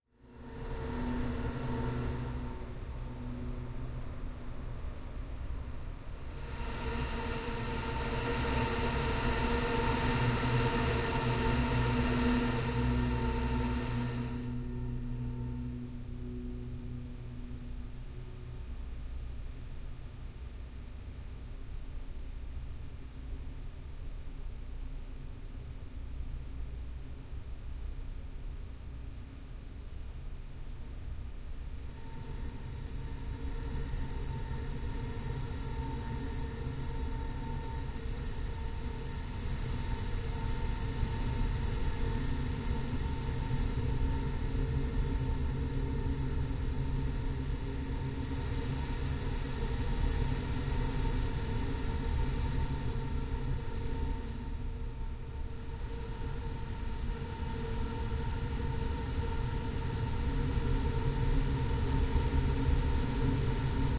Edited version of one of my inside of a water cooler bottle recordings processed with Paul's Extreme Sound Stretch to create a ghostlike effect for horror and scifi (not syfy) purposes.
spooky, ghost, haunting, paranormal, evil, scary, texture, demonic, bubble, stretch